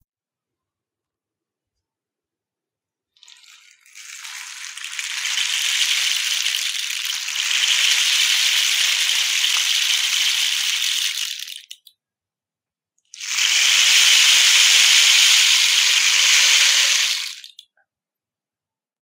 Rolling pebbles enhanced 6
These sounds are produced by the instrument called rainstick. It has little pebbles inside that produce some interesting slide noises when held upside down or inclined.
I hope they can help you in one of your projects.
device, handheld, instrument, LG, rainstick, smartphone